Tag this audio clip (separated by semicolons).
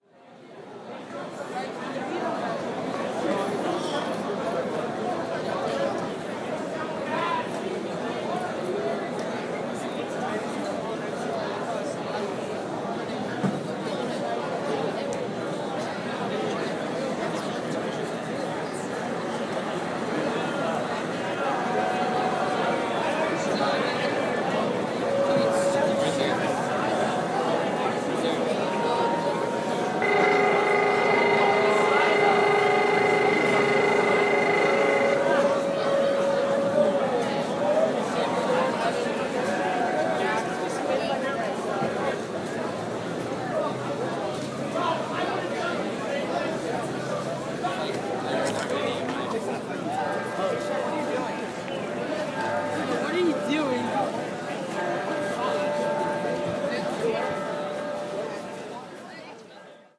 Soundscape; bell; commons